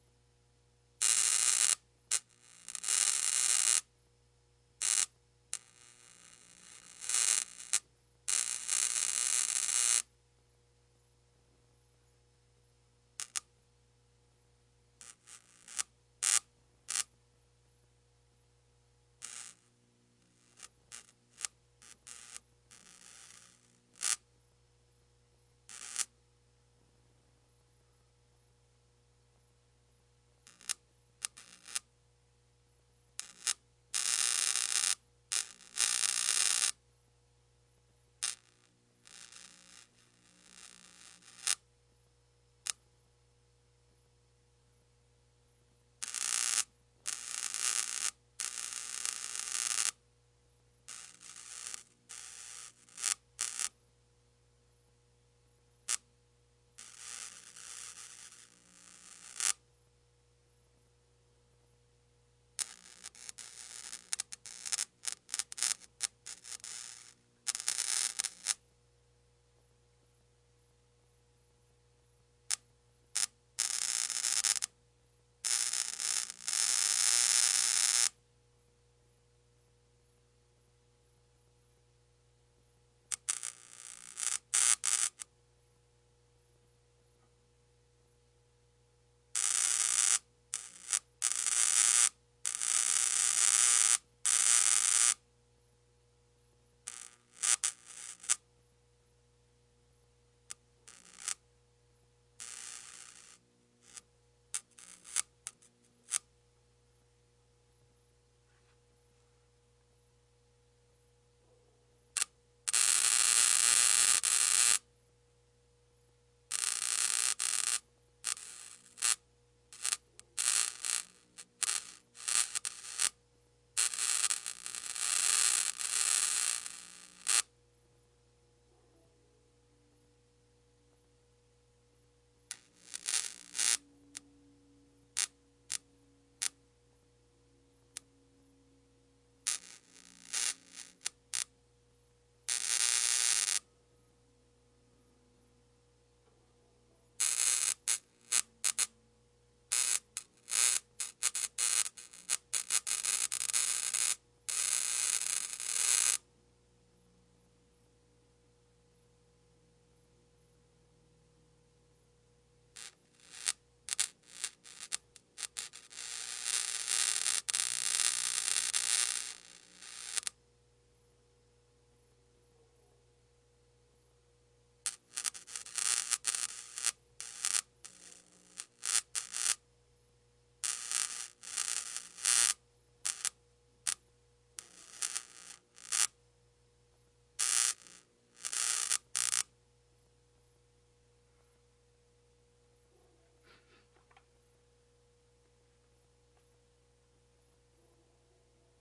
Bug Zapper1
I found that a good way to get cool electrical sounds is with any commercial bug zapper.